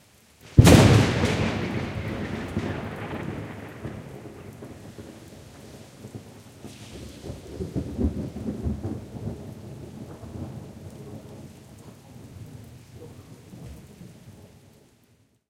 th loud
A very loud thunder clap with a distance of about 400 feet.
loud, purist, wheather